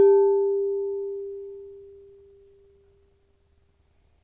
Sch 04 weich
Soft kitchen bowl
kitchen, gong, percussion, bowl